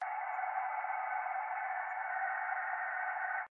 Muted Screams
This sound is actually the nearby water fountain. This was recorded with a Zoom H6N and then edited with Adobe Audition CC.
bizarre, dreamlike, evil, monster